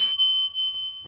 19th chime in Mark Tree with 23 chimes
marktree, chime, barchime